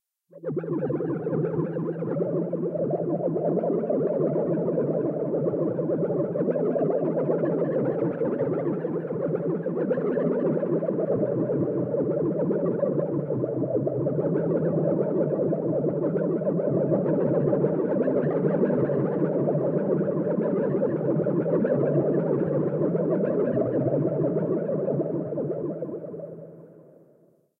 A continuous bubble sound effect.
bubbles, bubbling, effect, sound, soundscape